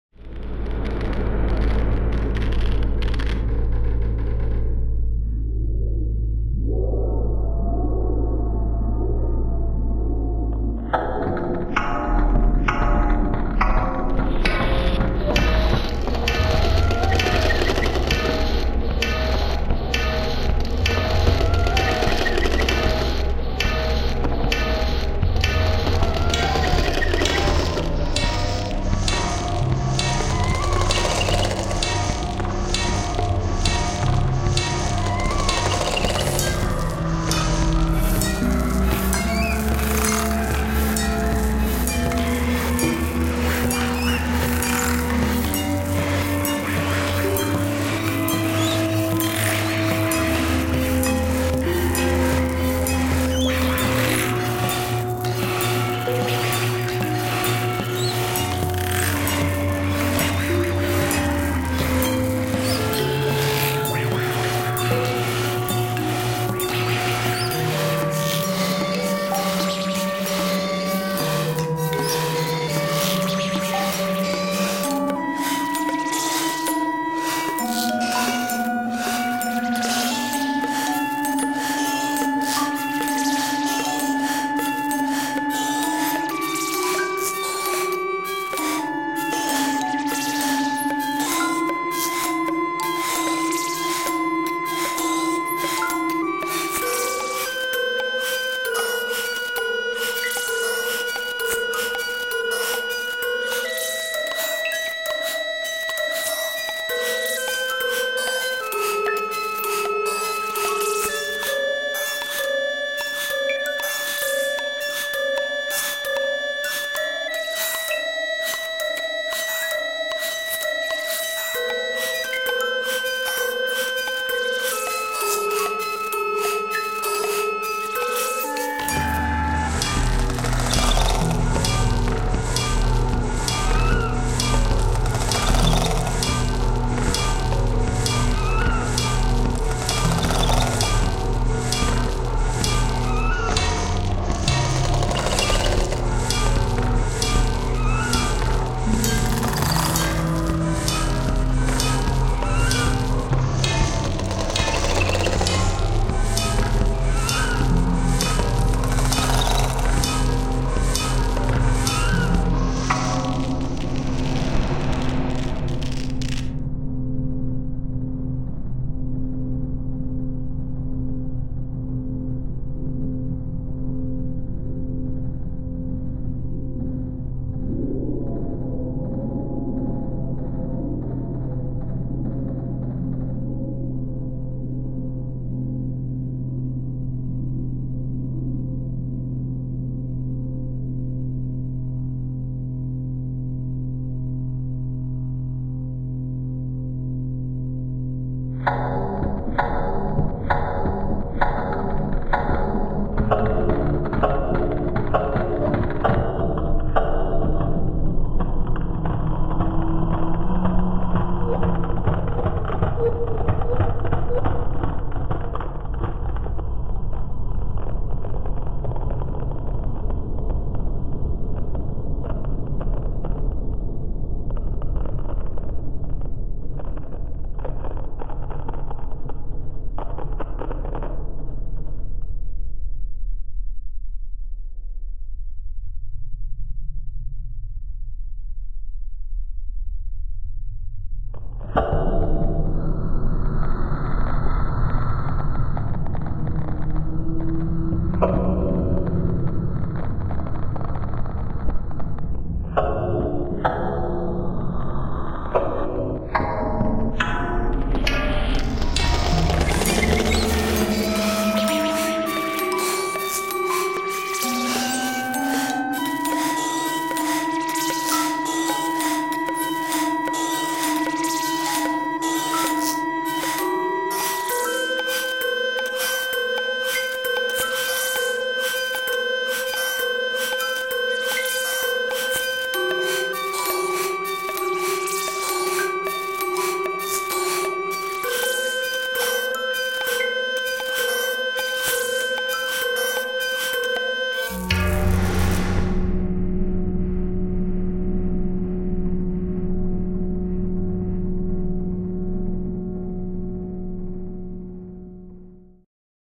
the apples are insane
radio, star, sounds, wave, SUN, future, space